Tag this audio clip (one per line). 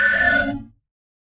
weird
abstract
resonance
sound-design
typing
computer
splash
button
push
digital
freaky
alarm
beep